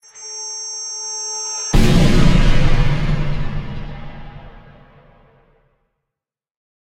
Plasma Burst (mix)
I decided to take the charge from chipfork's and slow it down and the burst from john129pats' and give it a bit more bass and some reverb, resulting in exactly what I need.
space, sci-fi